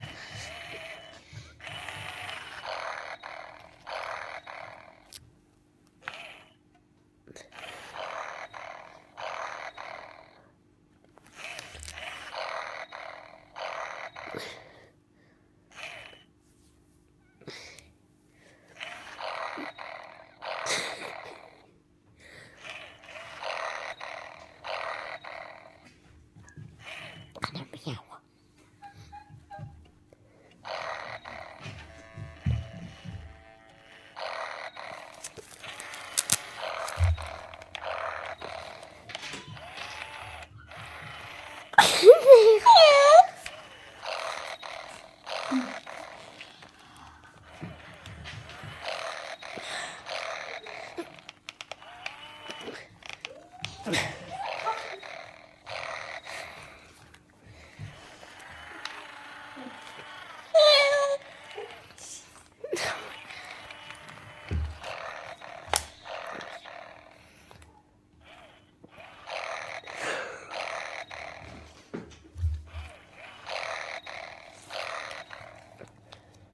02 Furreal Friends Cat
This is a recording of a Furreal Friends toy cat snoring. It was recorded at home using a Studio Projects C1.
cat, furreal-friends, toy